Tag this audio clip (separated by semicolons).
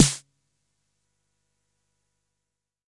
jomox; 909; drum; xbase09; snare